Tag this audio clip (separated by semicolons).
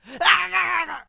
Action; Male; Shout